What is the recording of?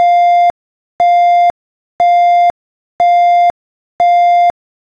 700 Hz beeps
An intermittent but consistent 700 Hz beep like an alarm clock.
alarm, alarm-clock, artificial, beep, beeping, computer, electronic, tone